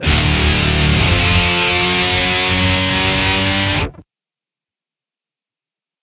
Metal guitar loops none of them have been trimmed. that are all with an Octave FX they are all 440 A with the low E dropped to D all at 130BPM